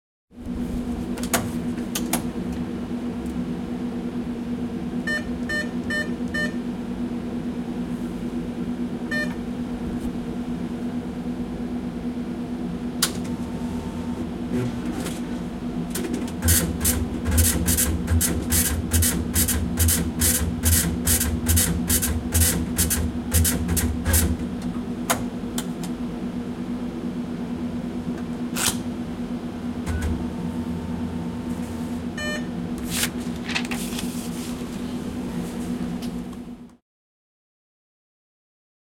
Transaction query at the ATM. Beeping, printing receipt.
Tapahtumakysely, piipityksiä, tositteen tulostus, lähiääni.
Date/aika: 1995
Place/paikka: Vihti